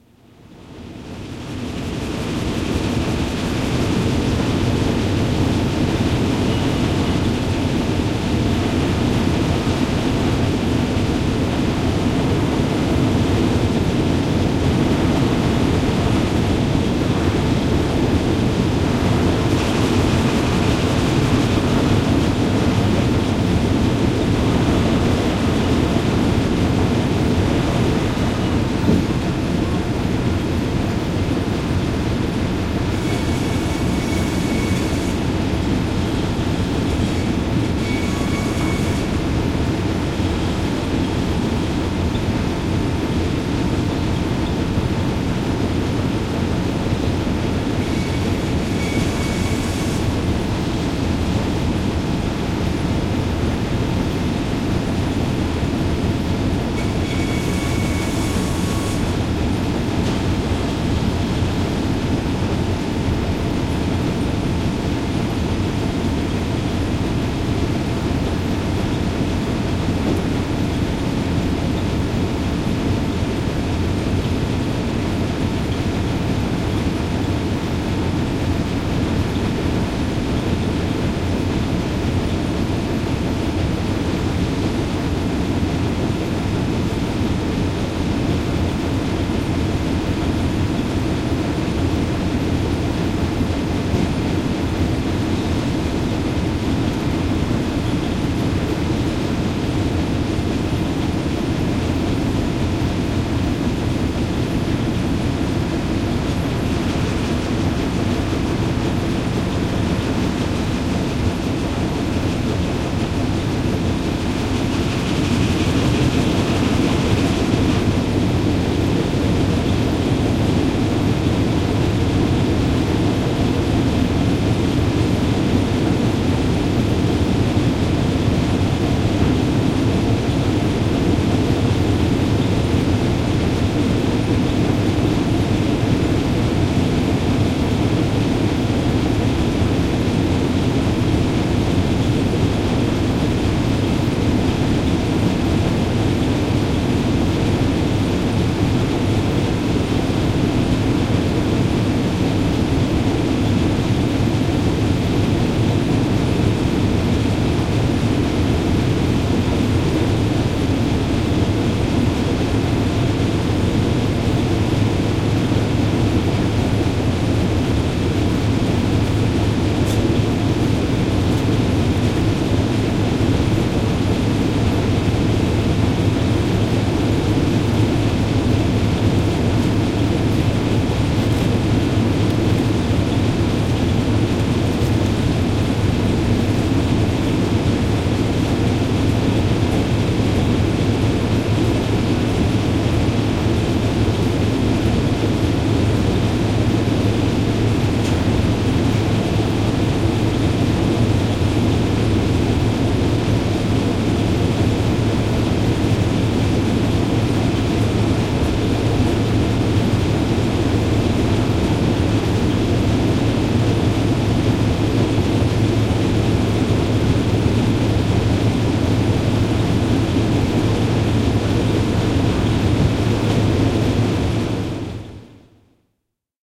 Saha, sahalaitos, kuljetin / Saw mill, 1970s. Conveyor belts, noises of the saw mill in the bg
Matkun saha, 1970-luku. Kuljettimia ja hihnoja, vetohihnoja. Taustalla sahalaitoksen hälyä.
Paikka/Place: Suomi / Finland / Forssa, Matku
Aika/Date: 04.04.1974
Saw-mill, Field-Recording, Koneet, Puuteollisuus, Belt, Hihnat, Puu, Soundfx, Kuljettimet, Conveyor, Machines, Suomi, Finland, Tehosteet, Conveyor-belts, Vetohihna, Yleisradio, Hihna, Saha, Sahalaitos, Woodworking-industry, Yle, Finnish-Broadcasting-Company, Mill, Wood, Kujetin